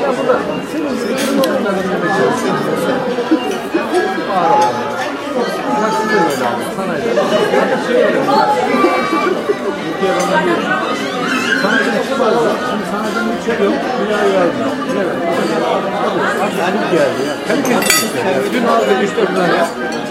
a looping ambiene of a restaurant ambience
ambience, cafe, croud, eating, foodshop, people, restaurant